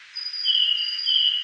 These are mostly blackbirds, recorded in the backyard of my house. EQed, Denoised and Amplified.
bird, blackbird, field-recording, nature, processed